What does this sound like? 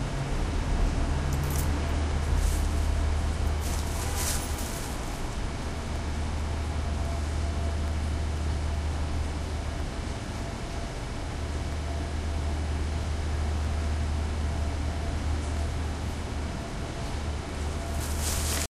Sounds of the city and suburbs recorded with Olympus DS-40 with Sony ECMDS70P. Upper floors of parking garage.